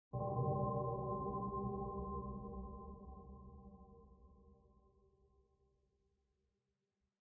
KFA16 100BPM
A collection of pads and atmospheres created with an H4N Zoom Recorder and Ableton Live